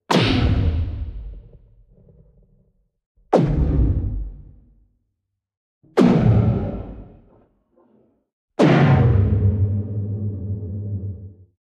Wild Impact
audio, boom, design, game, hit, impact, magic, rpg, thud